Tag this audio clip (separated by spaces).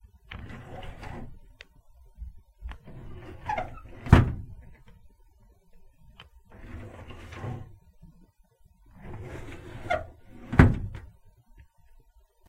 bed window bedroom lamp drawer